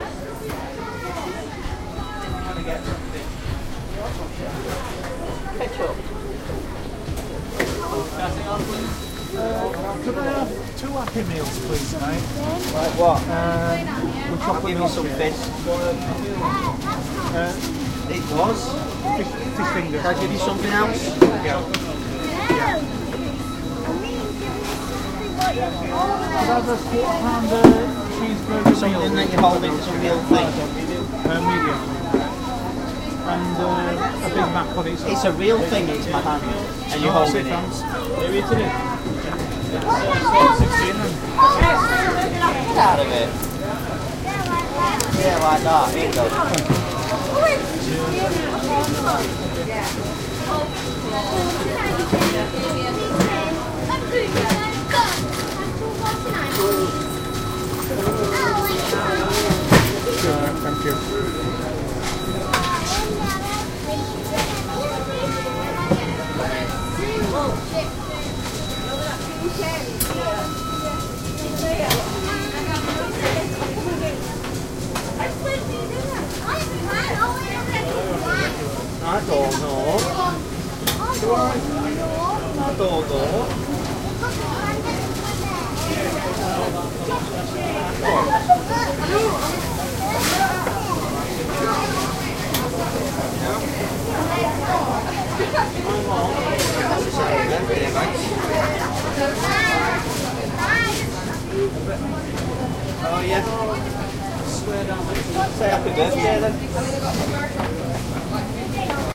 McDonalds Restaurant at Counter (UK)
Recorded activity at a UK McDonalds restaurant counter (why not) using a Zoom H2, edited on Cool Edit Pro, 19th July 2014
Ambiance, Public, People